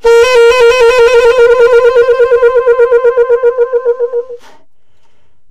TS tone trill bb3
jazz, sampled-instruments, sax, saxophone, tenor-sax, vst, woodwind